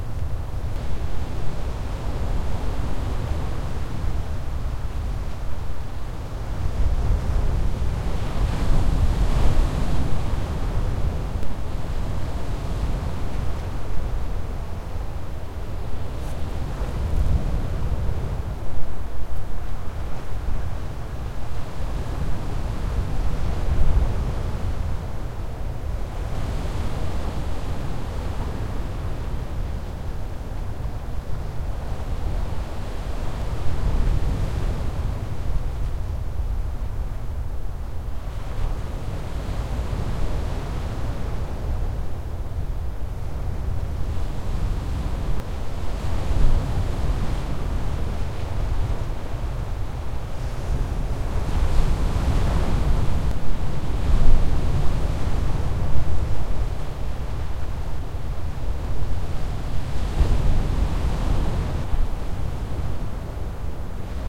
Wind and distant crashing waves from cliff top. 01
Crashing waves and wind recorded from a 40 foot clifftop using a TASCAM DR-05. I had a wind muffler over the mikes and the recording is left open without fade for your own editing. Enjoy.